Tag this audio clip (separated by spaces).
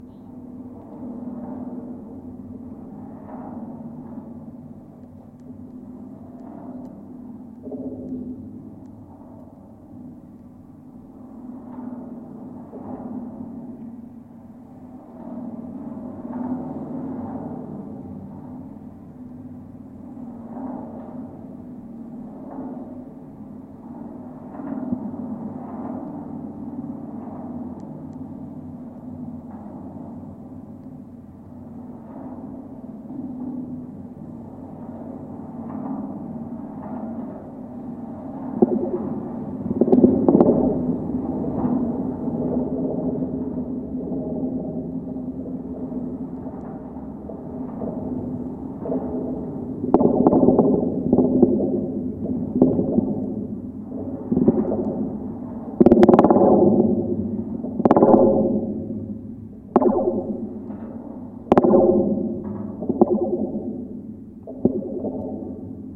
Golden-Gate-Bridge cable San-Francisco PCM-D50 bridge contact-mic Schertler Sony contact-microphone DYN-E-SET contact wikiGong Marin-County mic field-recording steel